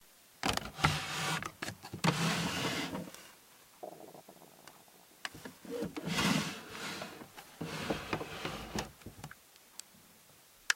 Opening/closing a drawer #3
Slowly opening and then closing a drawer.
close, closing, drawer